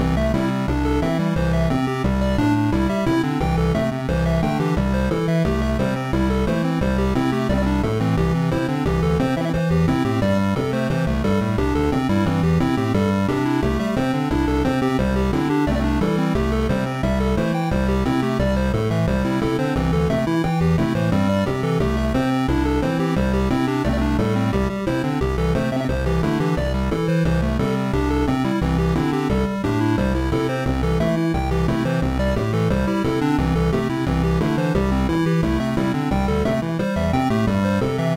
Chiptune 38 second Loop 10
Happy simple loop for different projects.
Thank you for the effort.